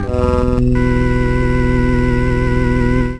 PPG 010 Little Mad Dance G#1
This sample is part of the "PPG
MULTISAMPLE 010 Little Mad Dance" sample pack. It is a digital sound
with a melodic element in it and some wild variations when changing
from pitch across the keyboard. Especially the higher notes on the
keyboard have some harsh digital distortion. In the sample pack there
are 16 samples evenly spread across 5 octaves (C1 till C6). The note in
the sample name (C, E or G#) does not indicate the pitch of the sound
but the key on my keyboard. The sound was created on the PPG VSTi. After that normalising and fades where applied within Cubase SX.